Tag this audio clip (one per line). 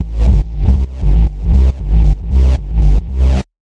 effect
electric
future
fx
sample
sound
strange
Synth